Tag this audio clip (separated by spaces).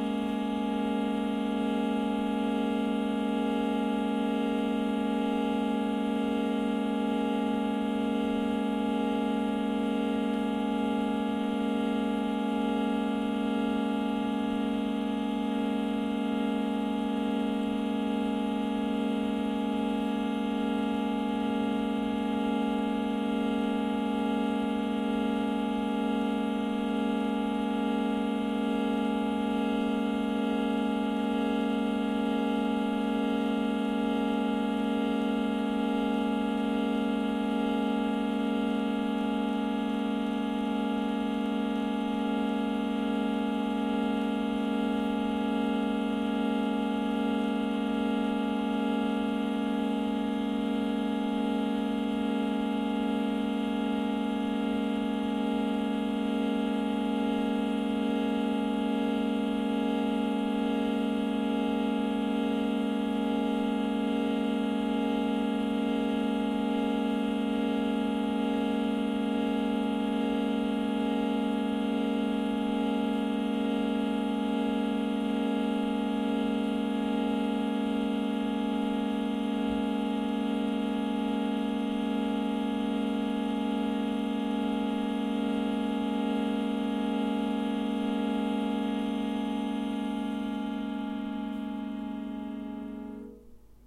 ambient atmosphere drone field-recording harmonium healing-sound soundscape